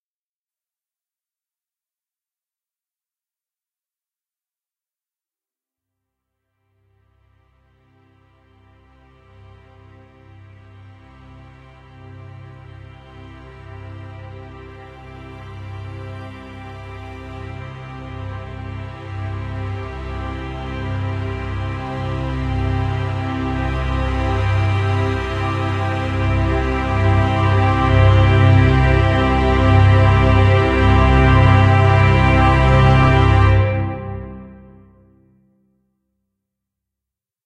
Slow build with mild horror string section. cinematic build